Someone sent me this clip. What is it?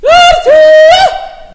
It's a scream